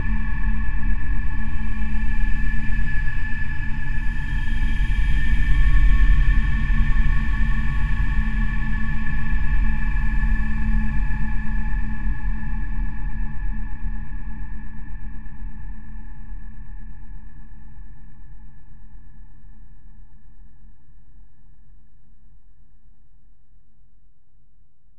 The final soundclip of a movie soundtrack from a nearby star. Created using Metaphysical Function from Native Instrument's Reaktor and lots of reverb (SIR & Classic Reverb from my Powercore firewire) within Cubase SX. Normalised.